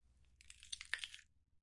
HOR Gore Celeri 05
Snapping celery in my basement:)
break, celery, gore, snapping